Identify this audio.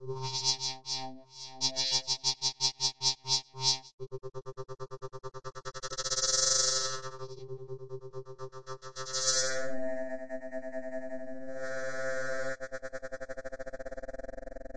granular synthesizer clockwork
This is a processed waveform of a soundeffect. I made it with fruity loops granulizer. Enjoy :)
glitch, abstract, electronic, sci-fi, freaky, soundeffect, sounddesign, filtering, granular, clockwork, processed, weird, fx, sound-design, grain, noise, strange, effect, synth, synthesis, sfx, digital